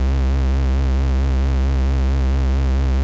Move It Bass
retro sounding bass great for oldschool rave or techno music created on my Roland Juno-106
bass, electronic, oldschool, Rave, synth, techno, trance